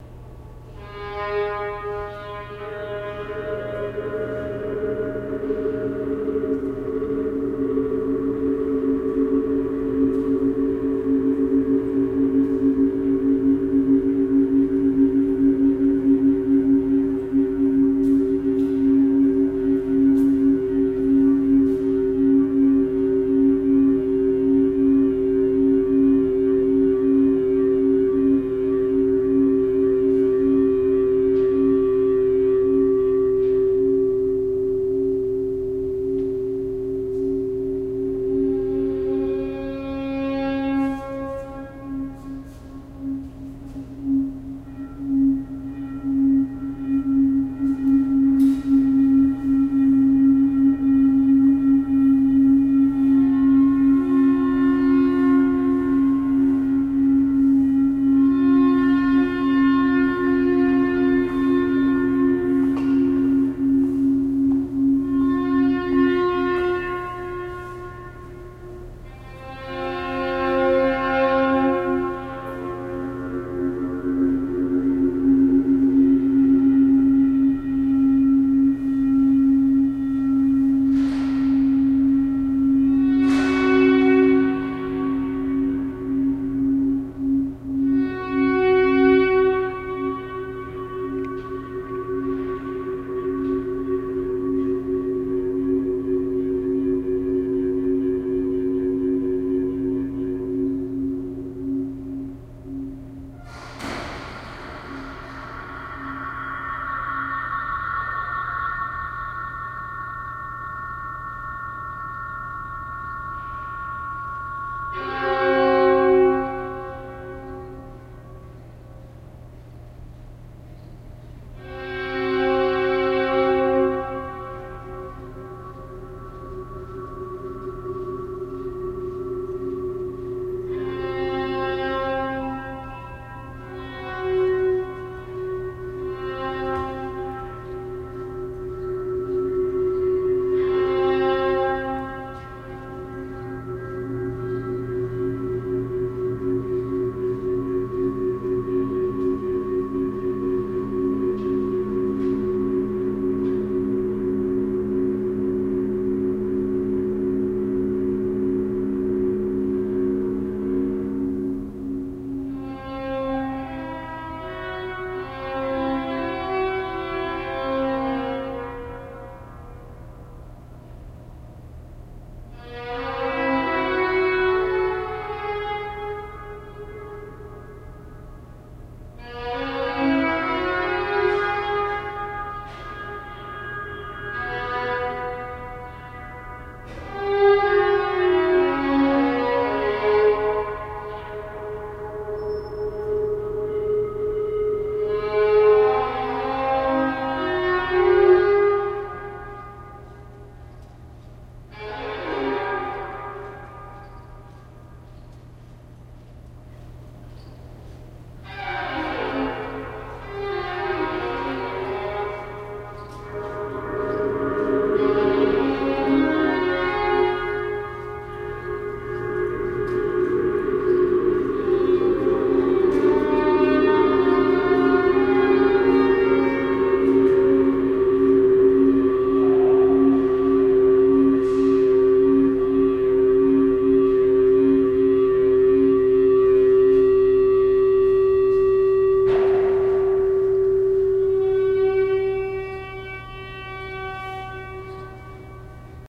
baker hall feedback experiment sample
At CMU, there is a building with a really long hallway that has amazing acoustics. In this experiment, I set up a feedback loop. On one end of the hallway, there is a microphone connected to a laptop. On the other end, there is a speaker connected to another laptop. The two laptops are connected via Skype calling. I play with my speaker's built in EQ, and play my violin to stimulate the resonant frequencies of the hall.
A better set up would be to use better equipment directly connected to each other, and have a stand-alone EQ to independently control what resonant frequencies are playing.
experimental, feedback, reverb, violin